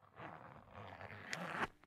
Zipper being zipped.
{"fr":"Fermeture éclair 5","desc":"Fermeture éclair.","tags":"fermeture éclair zip fermer ouvrir"}